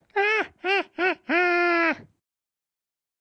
Risa Malevola s
evil, Laught, sarcastic